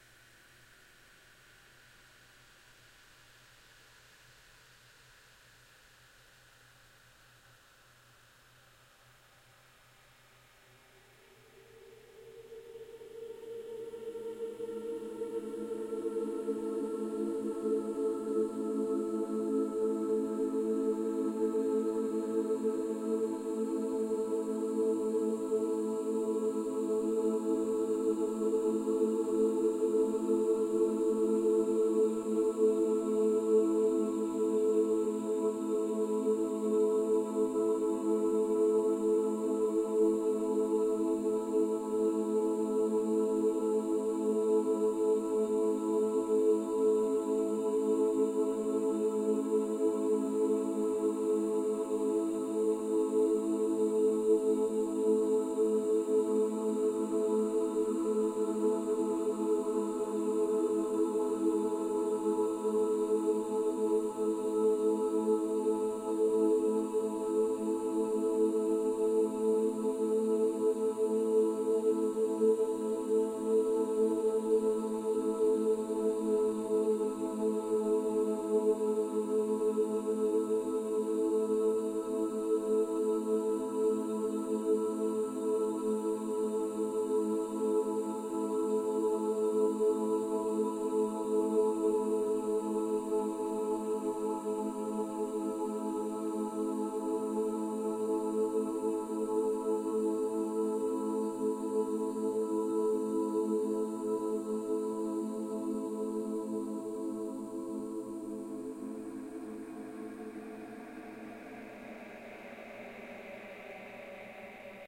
Drone Normal
A Paul Stretched Version of the choral drone.
These are recordings of a small female choir group I recorded for a college film back in 2012. I uploaded the cleanest takes I got, room noise is there but isn't terrible.
effects, Singing, Chorus, Vocal, PaulStretch